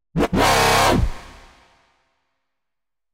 DnB&Dubstep 009
DnB & Dubstep Samples
dubstep, dnb, drumstep, drumandbass, bass